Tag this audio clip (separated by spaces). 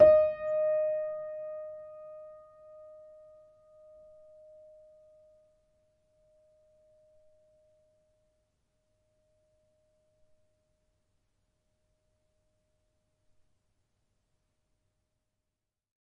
choiseul multisample piano upright